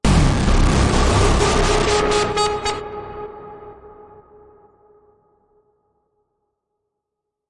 TGN Bang Bass FX01
Synthesized sound processed using a chain of effects.
synth, bass, destruction, bang, distortion